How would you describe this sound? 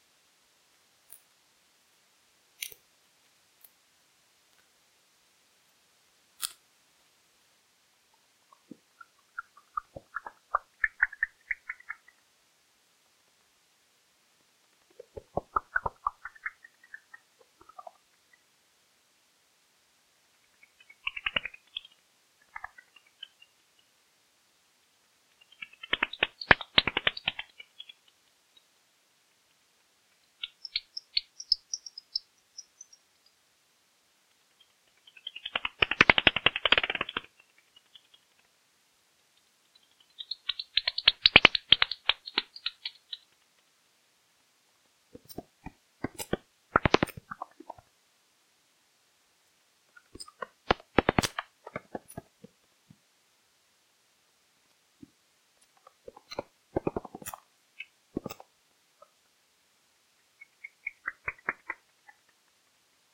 Bats in East Finchley London
Recorded outside my back door on a warm summer's evening.